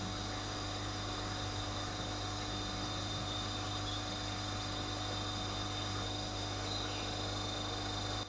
The small noise of the fridge doing cold